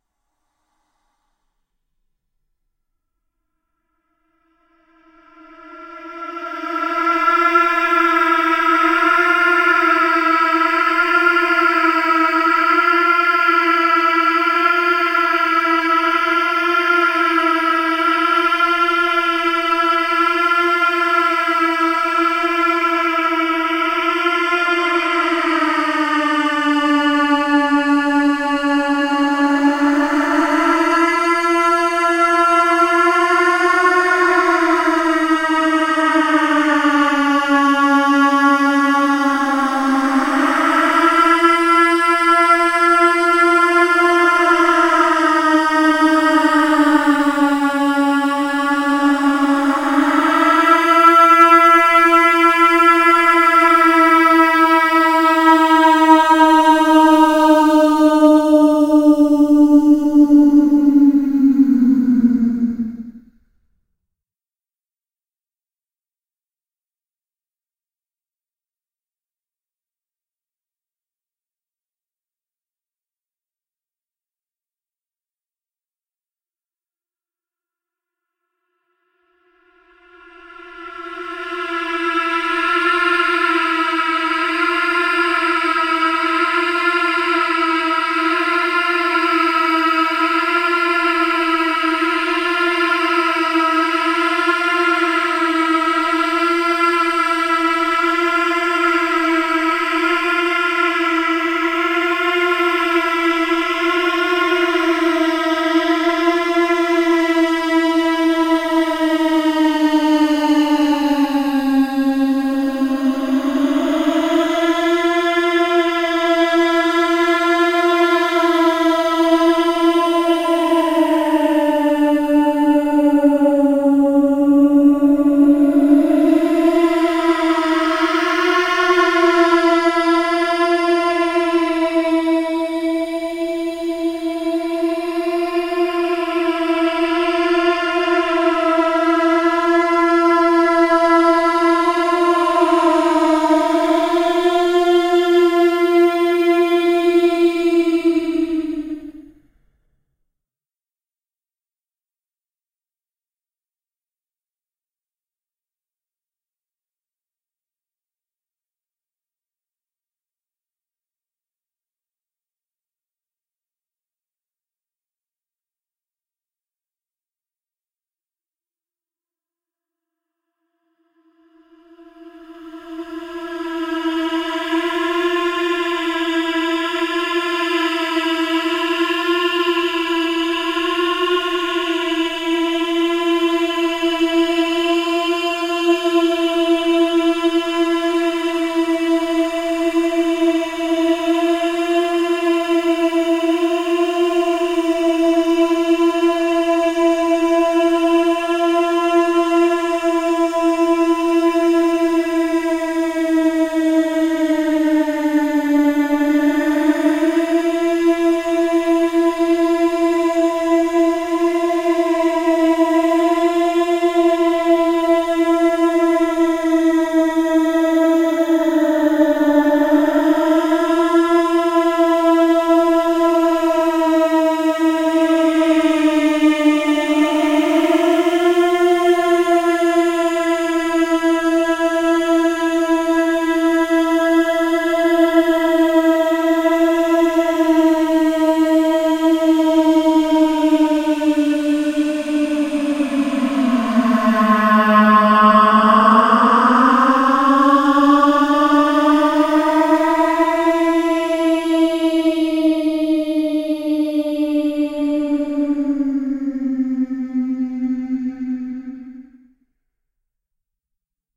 Strange Pleading Chant
This sound is simply me trying to make a silly melody into something darker. It is chanting that has been paul-stretched and reversed for effect, and it has some reverb and echo put on for further transformation. Use and use well, friends.